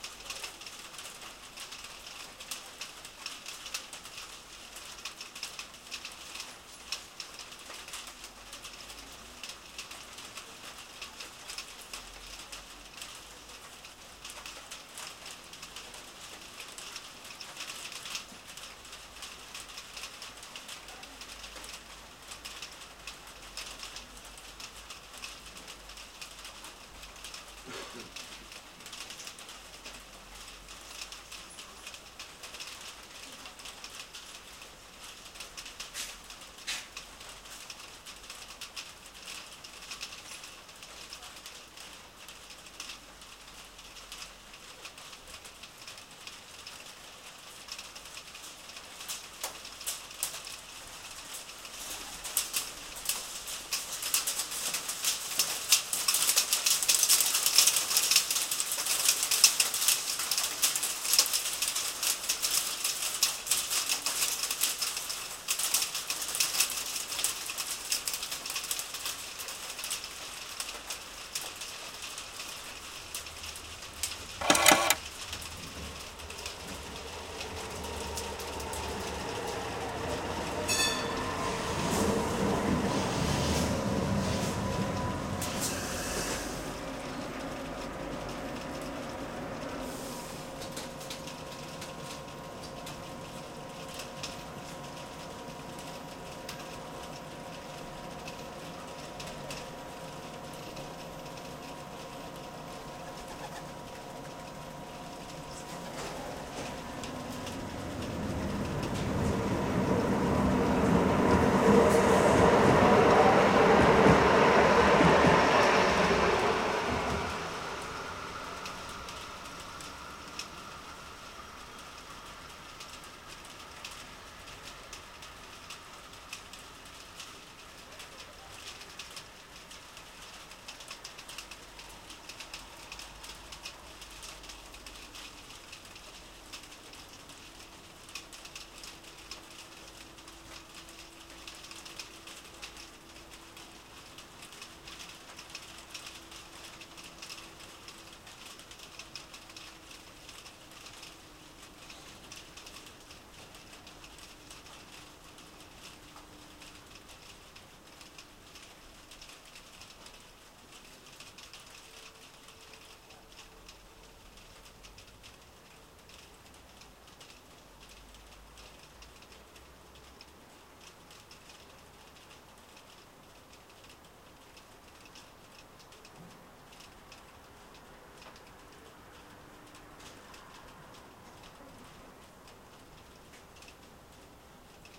121030 1429 dortmund-gleiwitzstr hagel
Light hail on a tin roof at metro-station Gleiwitzstraße, Dortmund.
Train arriving and departing.
Some people talking.
Ticket stamping machine noise at 1:14
Recorded on mobile phone huawai ideos x3 with recforge pro app
field-recording, hail, soundscape, train